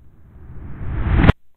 This sound was made by popping a cellophane bag and recorded using an M-Audio Microtrack II. This sounds really good as a firework or an explosion sound, especially with reverb.